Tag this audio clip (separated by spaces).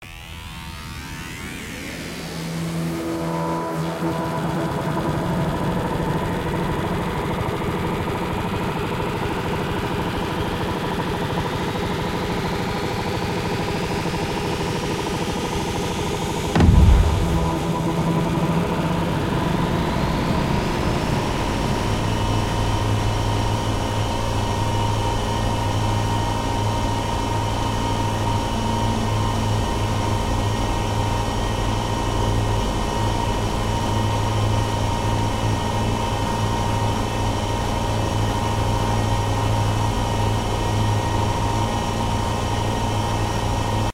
ambient artificial blast drone game long noise rocket space